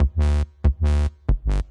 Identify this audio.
140 Reg dub synth 01
bertilled massive synths